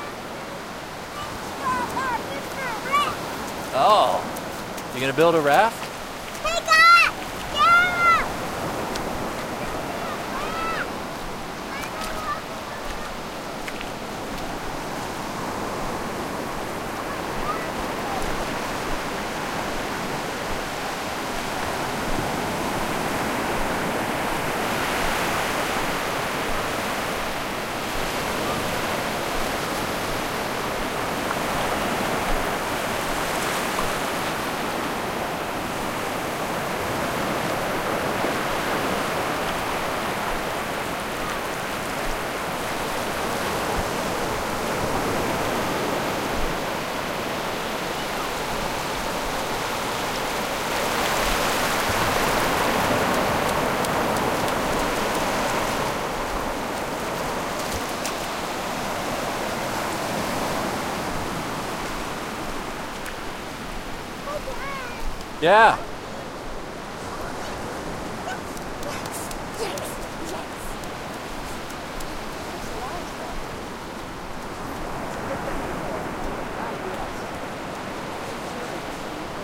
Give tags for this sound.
water sea coastal seaside ocean waves beach whoosh surf field-recording shore coast wave

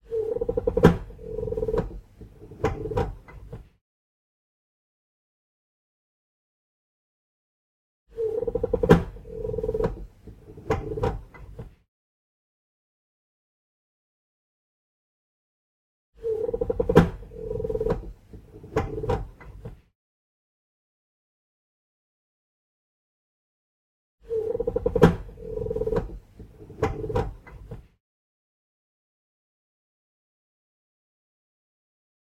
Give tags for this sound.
flat weird tack click meter ticking berlin clicking tick gas-bill household tock strange metal gas-meter domestic appartment

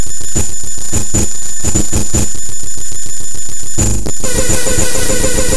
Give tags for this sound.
noise fubar electronic

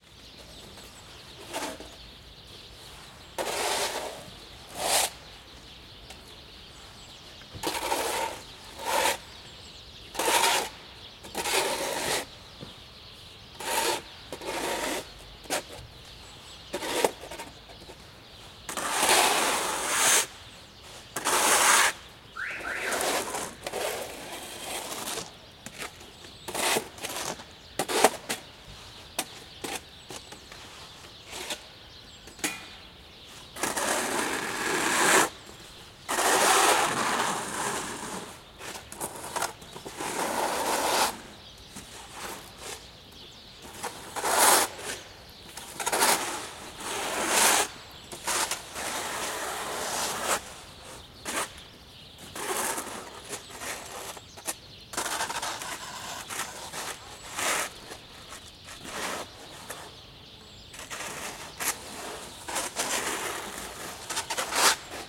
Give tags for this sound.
bird winter

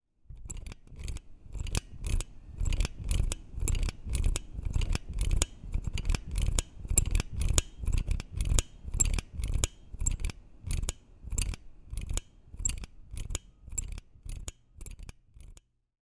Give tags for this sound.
ice-cream MTC500-M002-s14 metal scoop

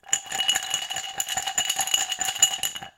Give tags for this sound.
bar drink glass ice-cubes restaurant shake

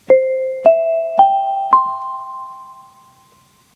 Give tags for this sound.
announcement chime

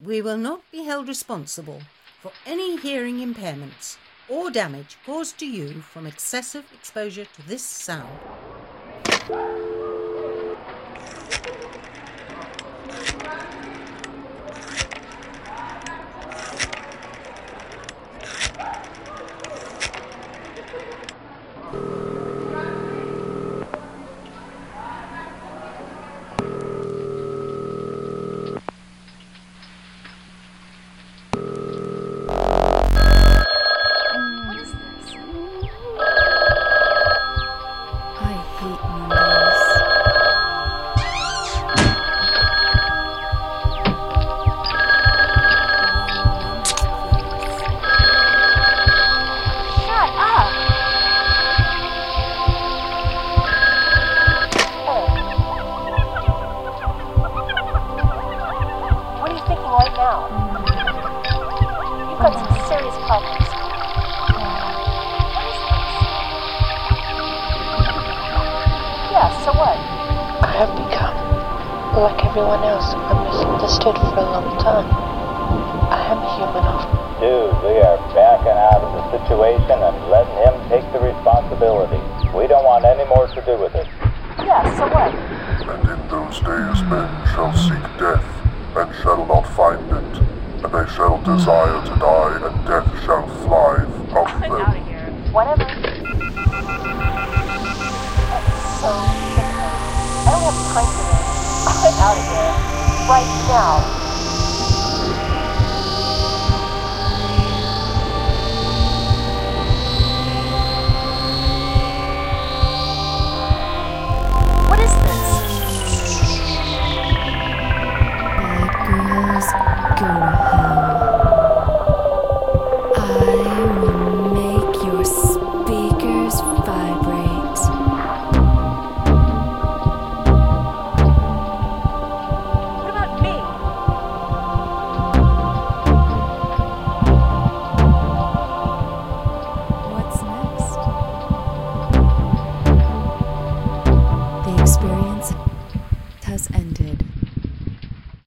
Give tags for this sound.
104712 104753 104758 104865 107738 21409 320k 32731 34301 50493 60753 72257 73758 86329 86386 86390 86445 86446 86448 86461 94628 94639 audio-theater corsica epanody luck mix mixed sampler wildchild

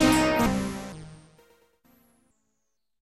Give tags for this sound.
sfx sound-design effect gameaudio indiegame soundeffects

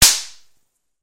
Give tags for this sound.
gun pistol shot Toy

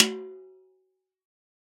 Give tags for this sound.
1-shot drum multisample snare velocity

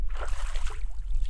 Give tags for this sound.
splash splish water

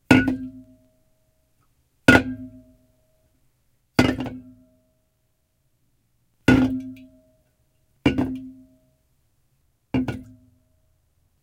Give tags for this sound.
falls crash

